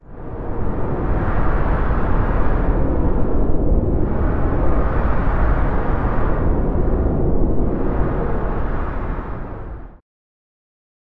DOIZY HADRIEN 2018 2019 HowlingWinds
On the beach during a windy day, close you eyes and relax.
I created a brownian sound thanks to Audacity and used a Paulstretch effect to emulate the ever changing direction and intensity of the wind.
Code typologie de Schaeffer : X
Masse : son seul complexe
Timbre harmonique : son assez terne
Grain : rugueux
Allure : variations mais pas de vibrato
Dynamique : attaque abrupte mais douce, je n'ai pas intégré de fondu en ouverture.
Profil mélodique : variation serpentine
wind, gale, beach, weather, windy, nature